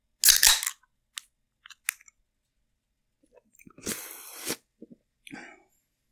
Just the simple sound of a can of beer being opened before taking a drink.